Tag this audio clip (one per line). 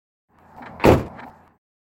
car
Door
pickup
Transport
Truck
Vehicle